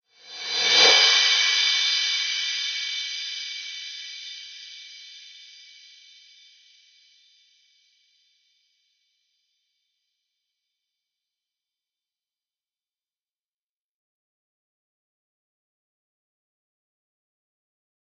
Rev Cymb 5 reverb
Reverse Cymbal
Digital Zero